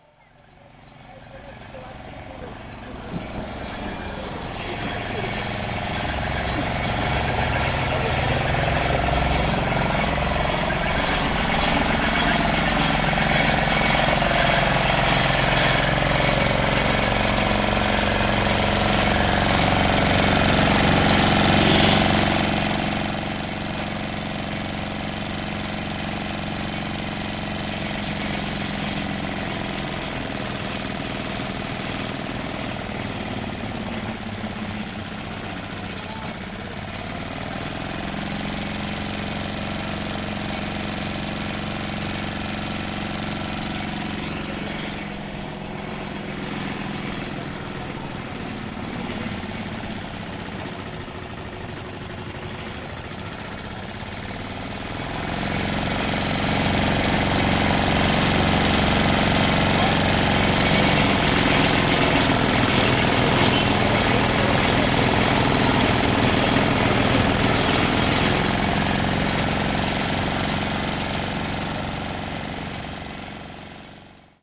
Noise made by a Sugar cane grinding machine...Recorded Using a Zoom h4n